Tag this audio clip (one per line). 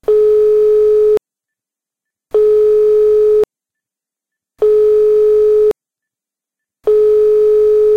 dudut
freizeichen
handy
mobilephone
phone
ringing
signal
tele-phone
telefon
telephone
tone